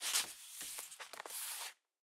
aturax leatherBookUI 77
book-pages, fantasy, user-interface